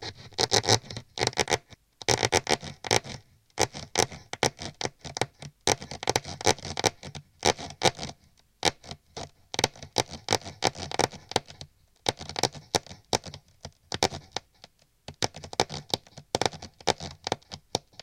I listened to a mouse last year. midnight and the mouse had decided to force a hinder of some kind, probably wood. I have tried to illustrate this annoying sound that went on despite I threw a couple of books to the wall.
animals, annoying, gnaw, mice, nightly, sounds